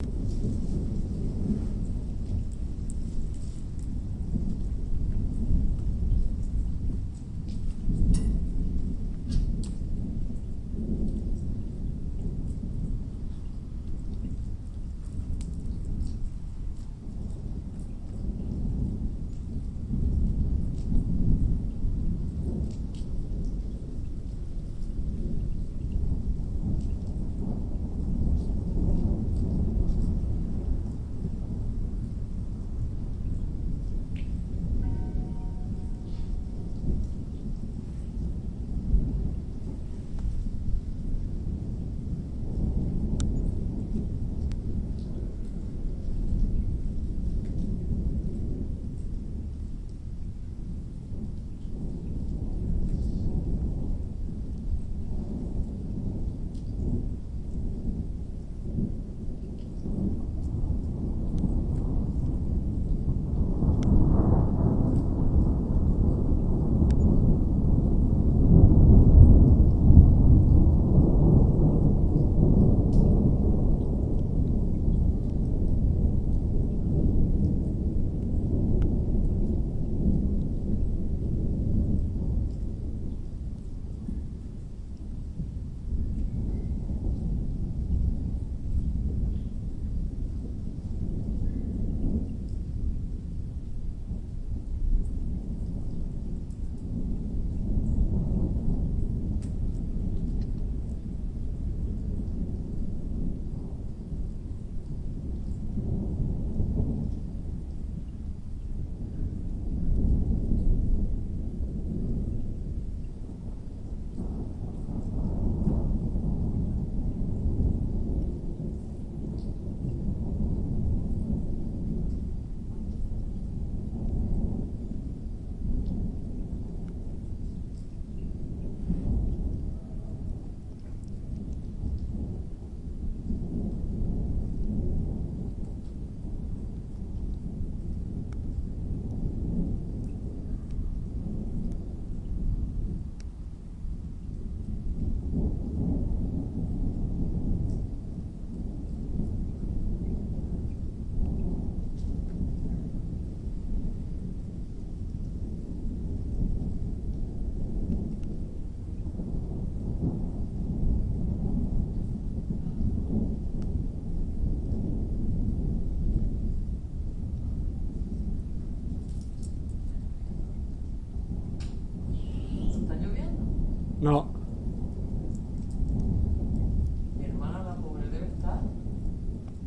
distant storm 3
Distant thunder storm. Gentle rain and non-stop thunders. Recorded on Marantz PMD 661 MKII built in stereo mics.
thunder-storm, weather, ambiance, thunder, thunderstorm, distant, rain, field-recording, ambience, truenos, tormenta, ambient, lightning, storm, nature